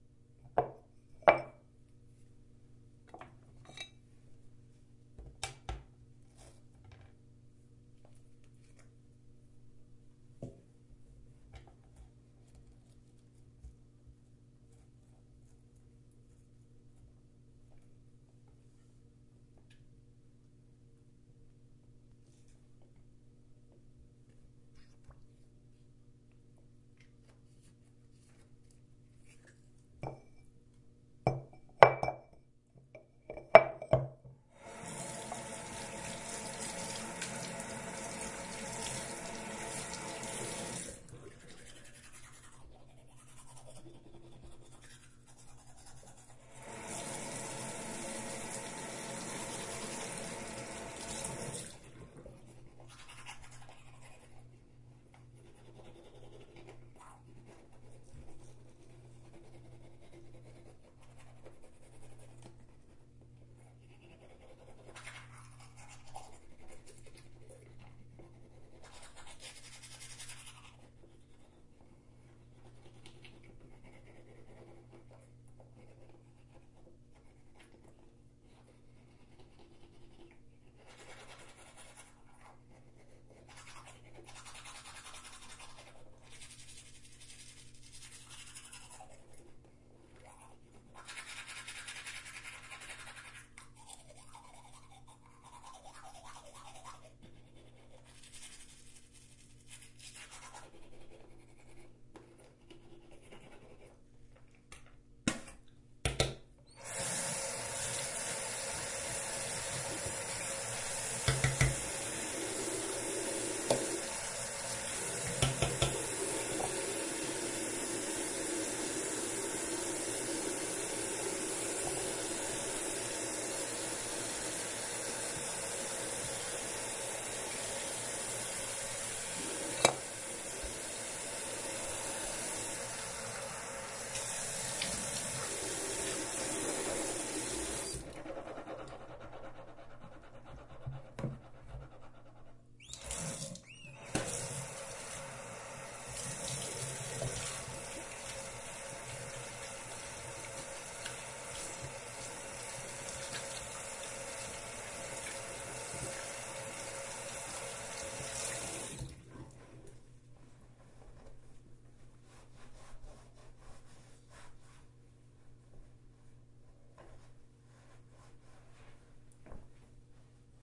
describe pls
A recording of brushing my teeth in my bathroom.